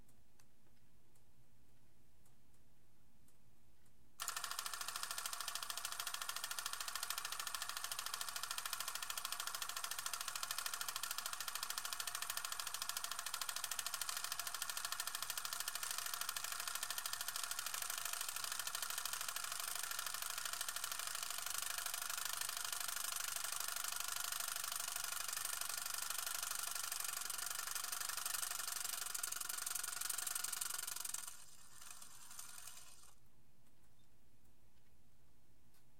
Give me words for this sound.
Bell & Howell 8mm Film Camera rolling shutter
film, camera, super-8mm, film-camera, field-recording, 8mm, super